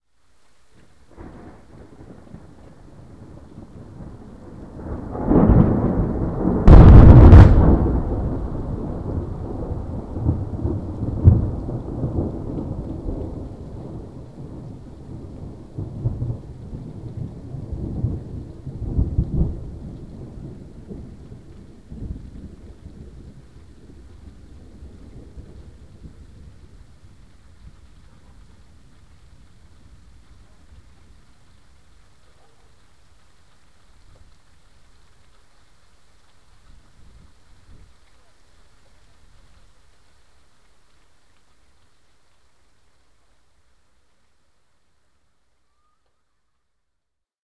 8th of June, thunderstorm, Pécel. Biggest lightning! Recorded by MP3 player. It resonated everything!
weather, field-recording, thunderstorm, thunder, lightning